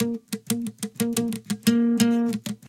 Sympatheque Guit B 7
jazz, music, jazzy
music, jazzy, jazz